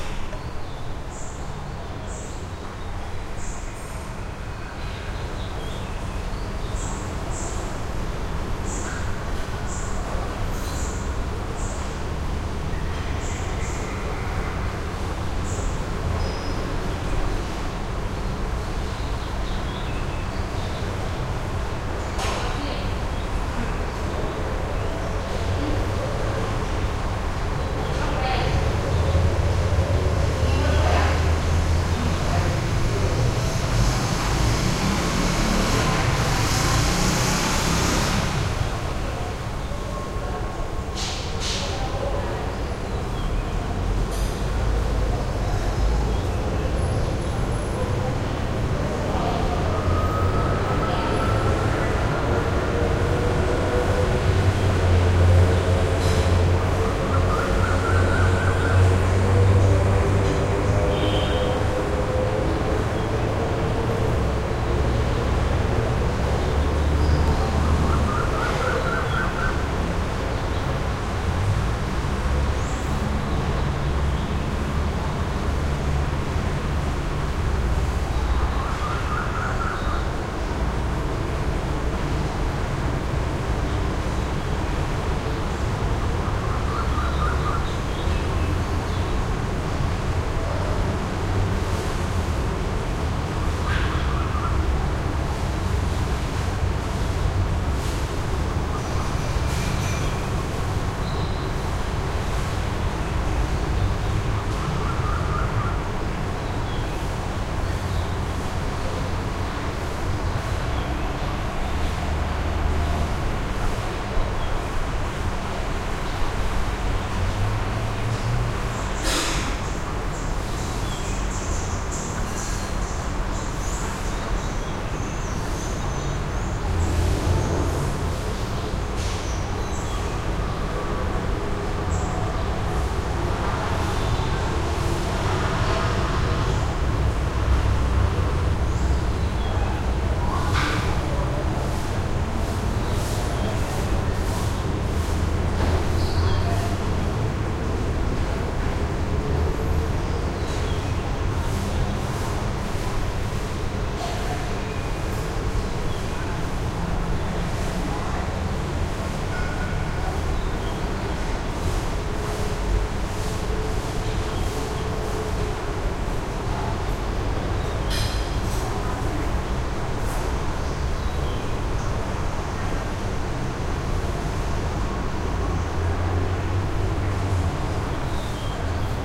Thailand hotel hallway ambience bassy heavy some ventilation +distant city skyline, traffic, hotel activity cutlery, birds, voices, sweeping
ventilation, hotel, activity, voices, skyline, traffic, birds, Thailand, hallway, field-recording